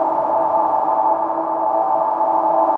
The sounds in this pack were made by creating a feedback loop of vst plugins in cubase. Basically, your just hearing the sounds of the pluggins themselves with no source sound at all... The machine speaks! All samples have been carefully crossfade looped in a sample editor. Just loop the entire sample in your sampler plug and you should be good to
go. Most of the samples in this pack lean towards more pad and drone like sounds. Enjoy!